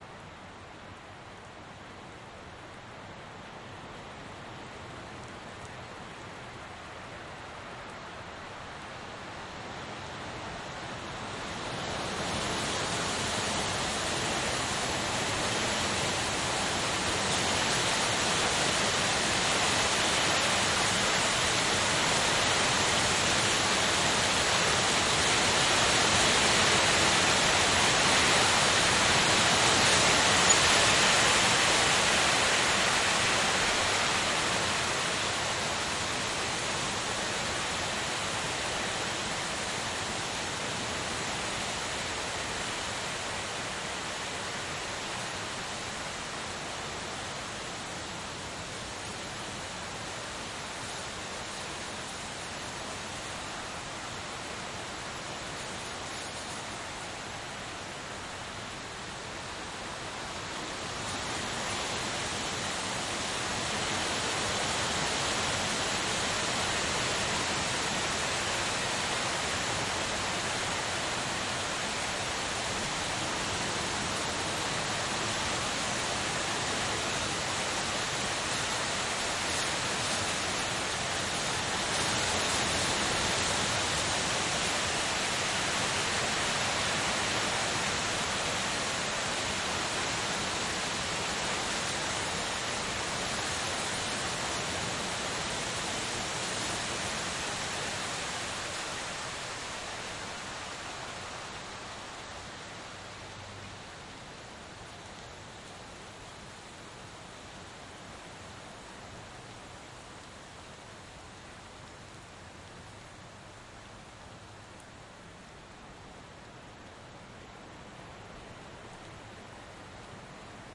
Rain and Hail passing - September 2009
The sound of hail-showers arriving. Recording chain Rode NT4 (in Rode Blimp) - Edirol R44 digital recorder.
tin-roof, wet, rain, wind, storm, hail